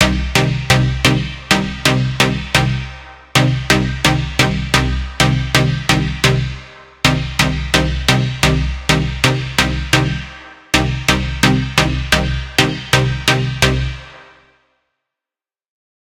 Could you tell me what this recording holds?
Deep House in Serum.
Dance, EDM, Electro, House, Techno